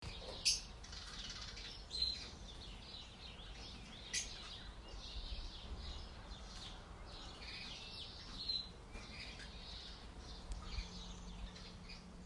Sound of birds